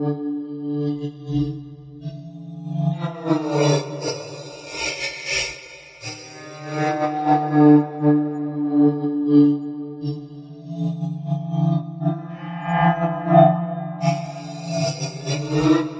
Frankenstein FX (120 BPM)
Frankenstein Lab Melodic Sound FX. No Key, 120 BPM